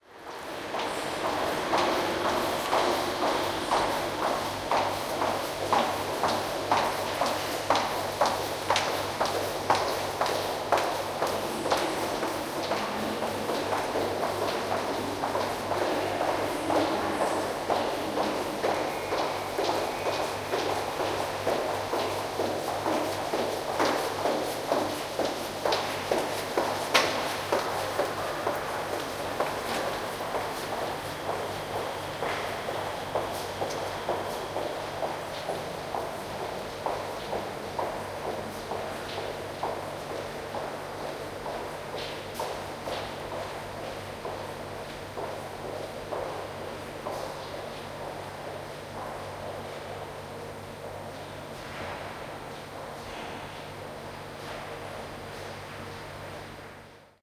two women walking in subway
Two women with high heels passing by consecutively in a subway of a Cologne underground station.OKM binaurals, Marantz PMD671